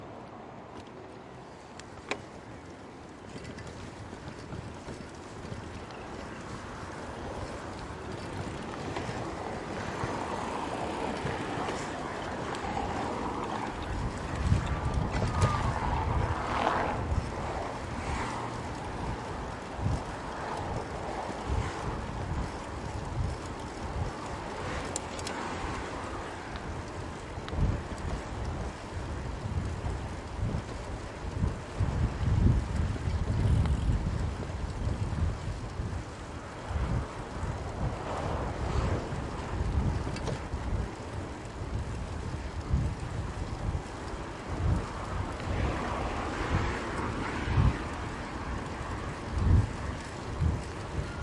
4ch surround recording of a bike riding through city traffic (Leipzig/Germany) on an evening in late spring.
Recorded with a Zoom H2 with a Rycote windshield in a shock mount fastened to the center frame above the pedals.
All recordings in the set are raw from the recorder and will usually need a hi-pass filter to deal with the rumble.
Bumpy ride along a badly maintained road with heavy traffic passing by.
These are the REAR channels, mics set to 120° dispersion.